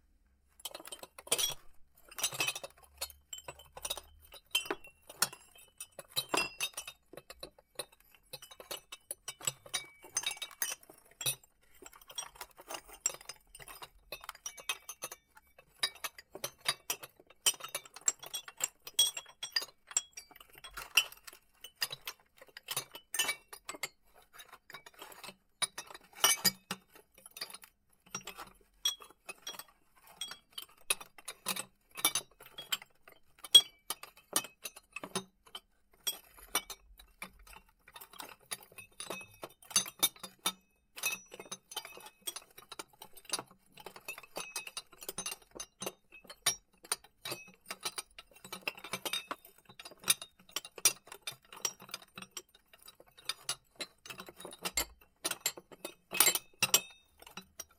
glass rattle
This sound was recorded as foley to replace sound of taking christmas decorations from box. There is bunch of glasses (and wineglass) in a carton which were shuffled gentle.
Recorded with NADY TCM-1050 mic plugged in Mbox Mini